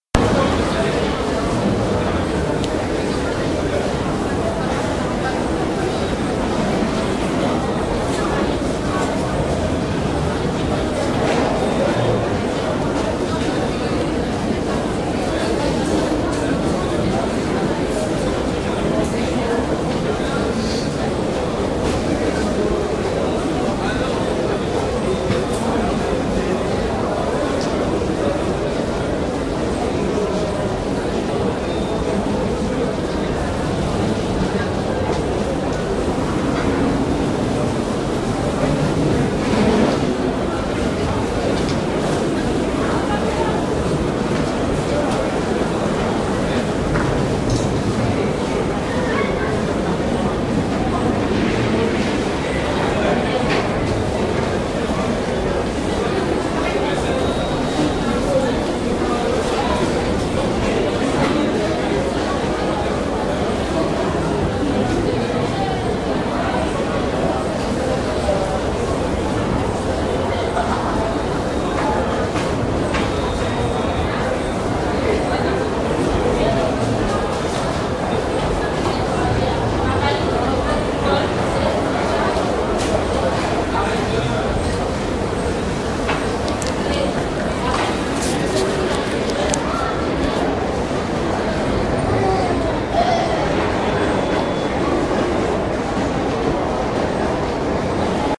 Station in southern France
Lobby of the station of Montpellier (Languedoc Roussillon). Registration is February 3 2012.Lobby of the station of Montpellier (Languedoc Roussillon). Registration is February 3, 2012.